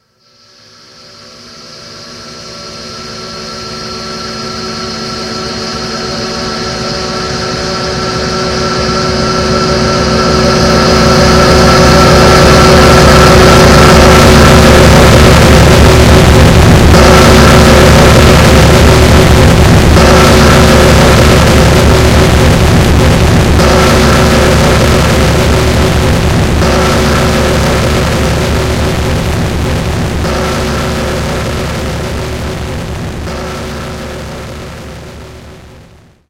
The Most Terrifyingly Traumatic Noise Stockpile to Ever Exist
loud, implosion, blast, noise